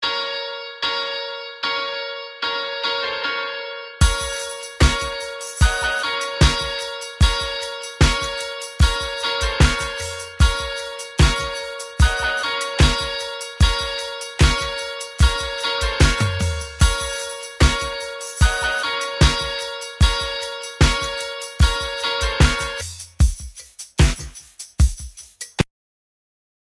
cool music made by me 6
this was made by me in garageband! on tue 18 2018 :D
rock, free, music, sound, cool